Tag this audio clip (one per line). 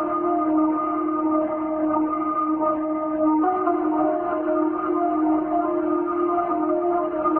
Synth
Logic
Sculpture